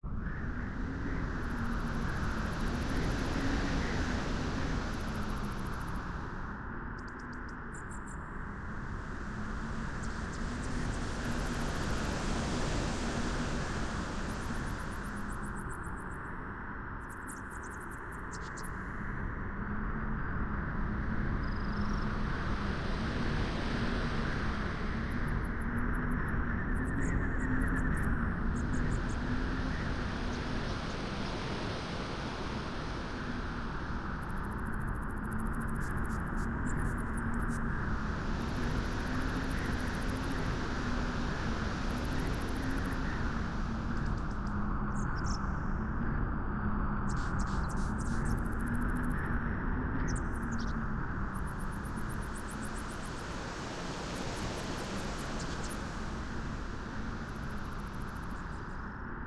Wind on Beach
Multi-layered ambience recording done with Yamaha keyboard.